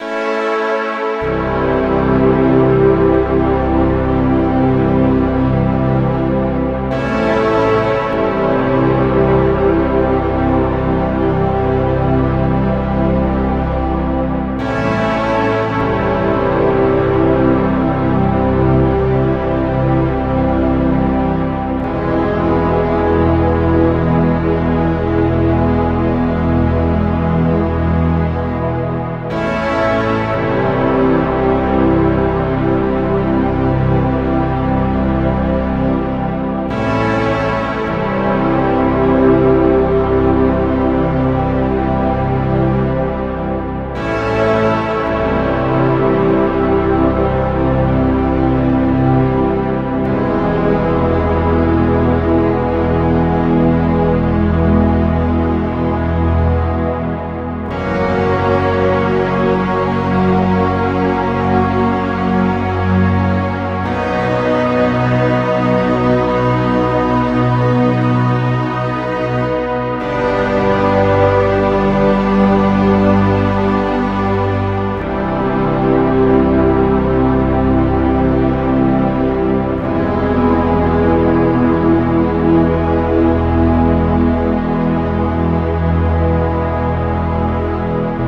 did this on keyboard on Ableton hope u like it :D